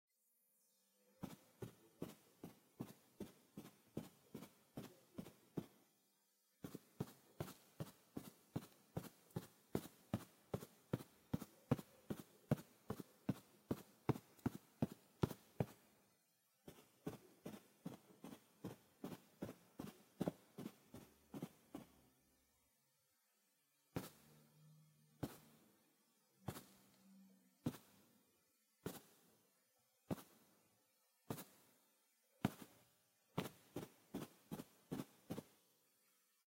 Might be useable for a normal or fast walk.
agaxly, fast, normal, walk